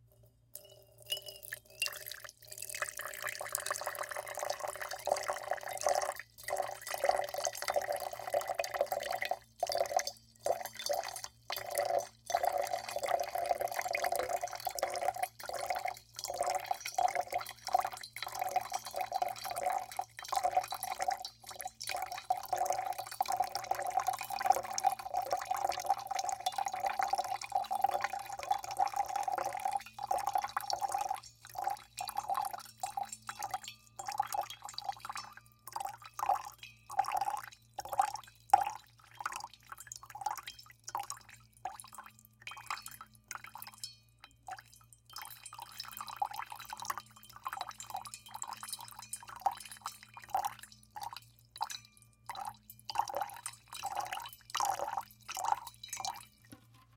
Slow Trickle into Container FF347
Liquid trickling into container with liquid, less consistent pouring, louder